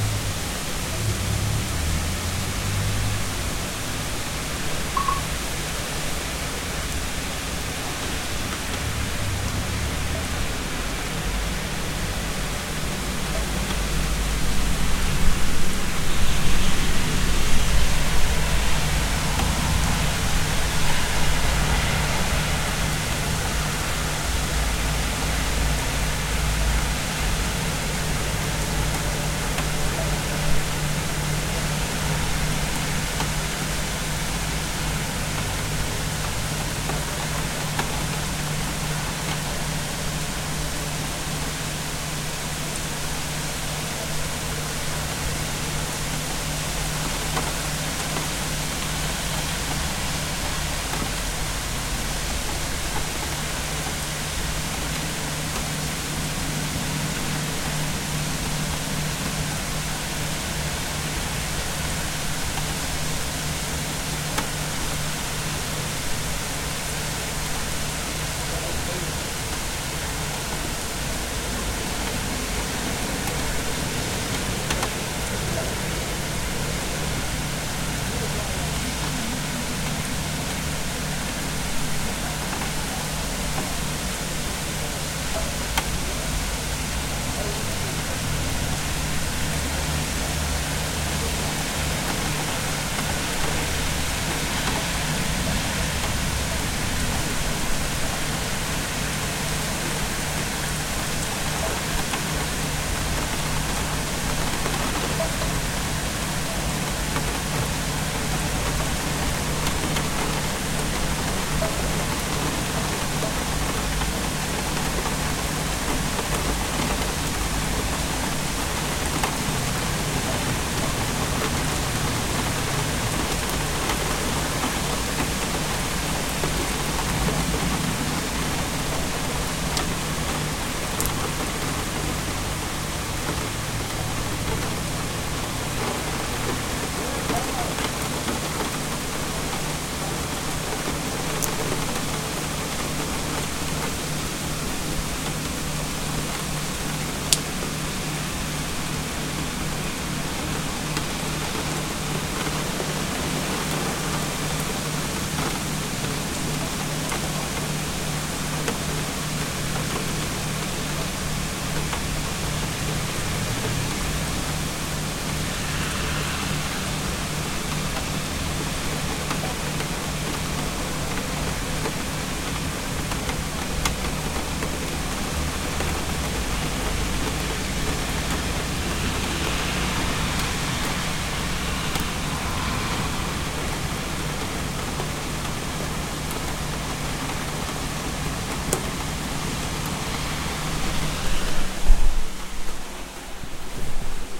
huge rain
heavy-rain, nature, rain, sky, weather